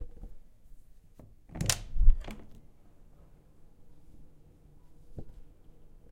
1. Door handle
Door handle opening
door, handle